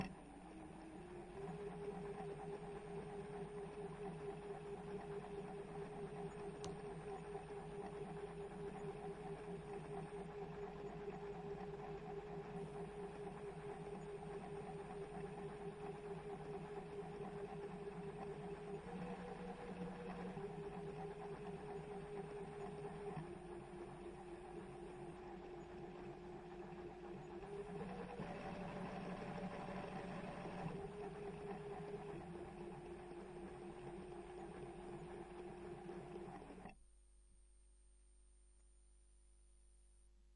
Queneau machine à coudre 43
son de machine à coudre
POWER, machine, machinery, industrial, coudre